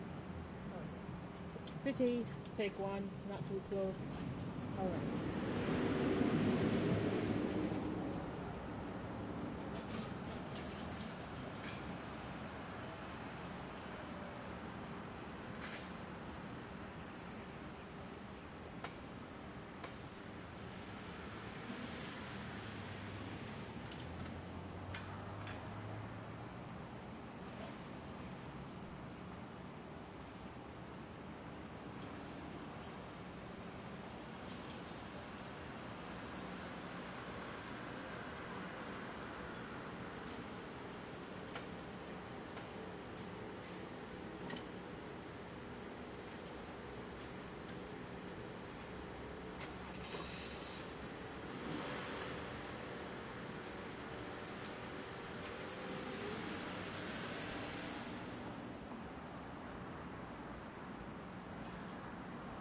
A morning recording of the small metropolitan area outside the school.
cars, early, city